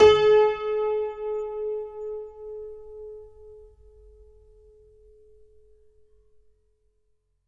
Simple detuned piano sound recorded with Tascam DP008.
Son de piano détuné capté au fantastique Tascam DP008.
piano, prepared, detuned